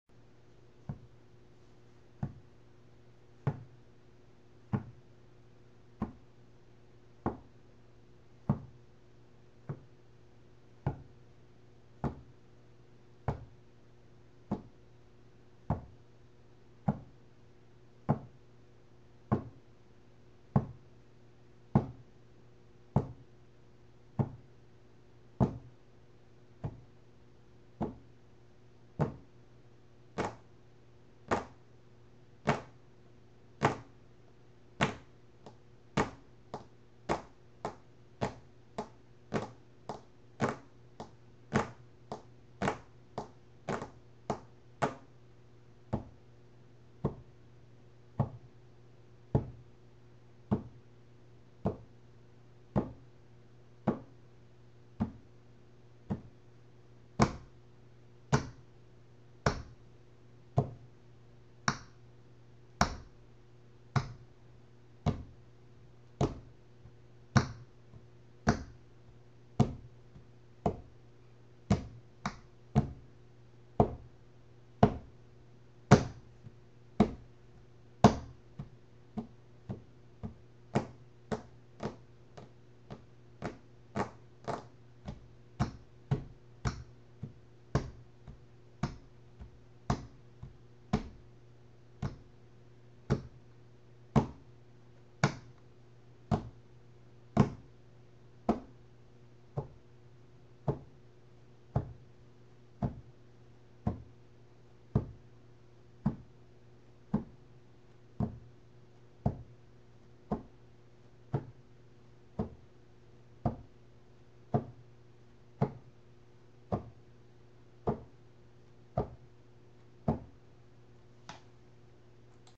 hands hitting table
I was hitting my wood desk with the tips of my fingers at the beggining then tapping my fingernails and then I was hitting my palms and the ring on my hand also made a sound.
beat, finger-nails, rings, table